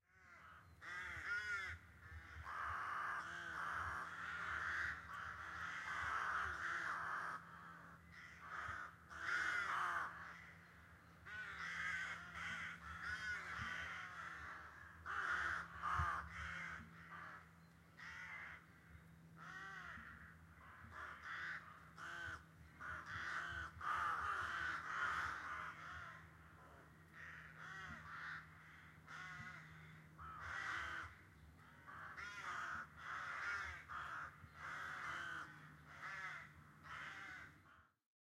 A recording of crows cawing in the trees. The sounds were recorded in March 2018 while it was still quite nippy outside.
aviary, bird, birds, birdsong, cawing, crow, Crows, field-recording, forest, nature, spring